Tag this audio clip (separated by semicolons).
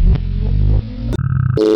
backwards; beep; clicks; computer; keyboard; mix; phone; processed